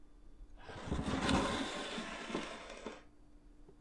glass slide 03
sliding a glass across a table
table, slide